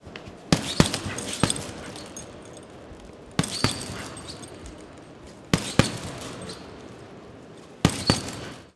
adult professional boxer hits punching bag with trainer comments in Russian 04
Professional boxer hits punching bag while training routine, his trainer gives some comments in Russian language. Huge reverberant gym.
Recorded with Zoom F8 field recorder & Rode NTG3 boom mic.
punch, trainer, kid, punching-bag, box, Russian, hits, sport, fighter, boy, training, punching, boxing